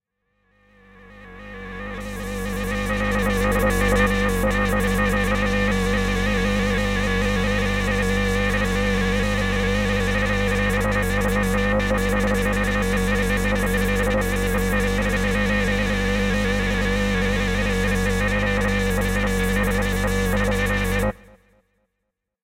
The arrival of the lord of the flies
created with the ImpOscar synthesizer.
flies, fiction, fx, insects, bees, nature, soundscape, drone, science